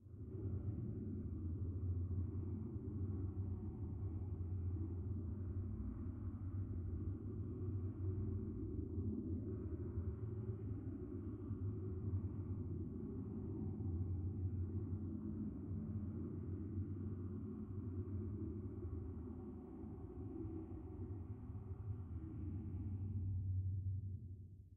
drone sound cockpit
Room tone for the cockpit in a science fiction movie. Various drones processed in Samplitude.
ambience, cockpit, drone, fiction, room, science, tone